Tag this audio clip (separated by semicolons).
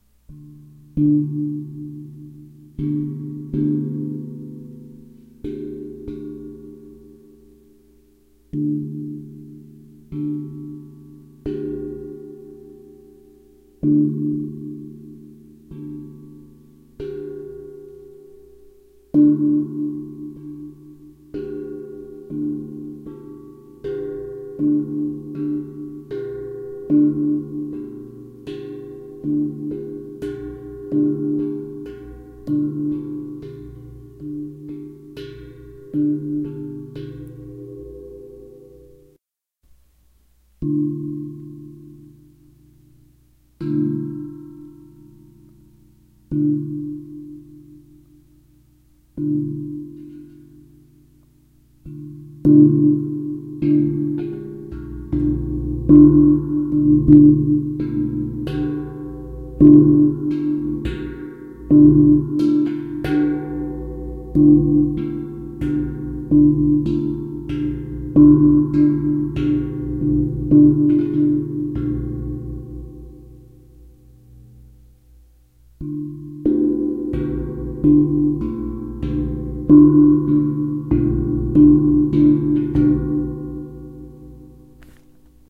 gong; resonant